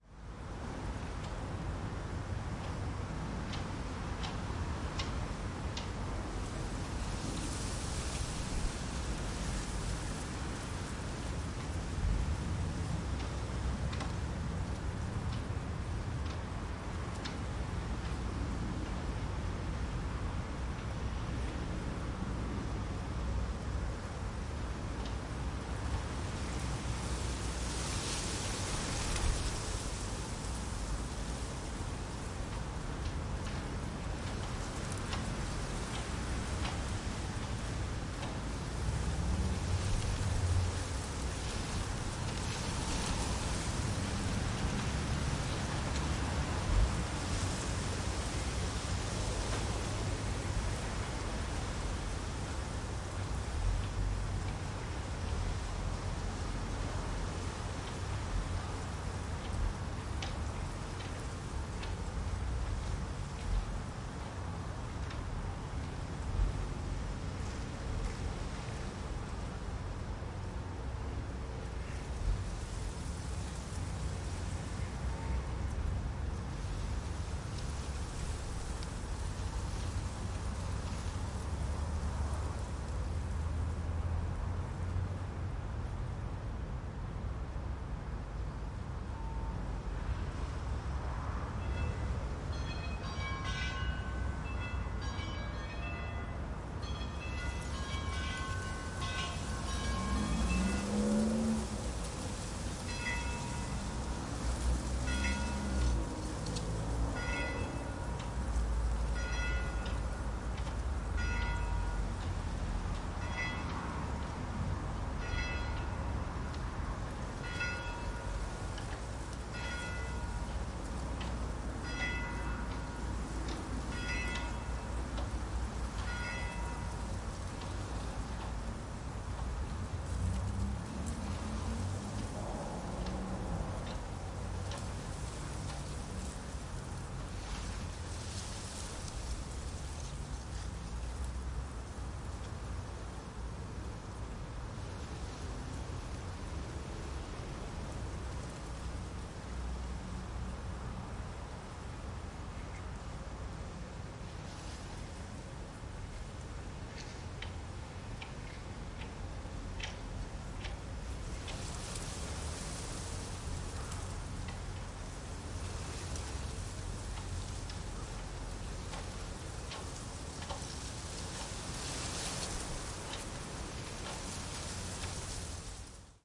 Windy day in Beaulieu Gardens - midday bells
Windy day at the Beaulieu Estate in the New Forest, UK. You can hear leaves on the ground moving around, wind in the trees and plants and the clock tower ringing 12 bells for midday.
Rode SVMX into Tascam DR-22WL
gale, gusts, wind, beaulieu, lunchtime, midday, forest, bells, new, trees, clock, weather, windy, uk